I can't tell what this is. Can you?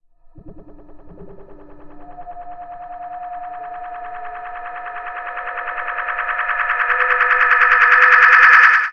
ERH p1.2o2bb12 14 alien sounds alienpproach-rwrk
remix of "p1.2o2bb12_14_alien_sounds" added by ERH (see remix link above)
etxreme tape delay
retro, creepy, sci-fi, illbient, alien, processed, dark, roots, remix, dub, soundesign, soundtrack, filter, vintage, ufo, space, electro, outher, reggae, spooky, synthsizer, delay, fx, synth, film, scary, score, effect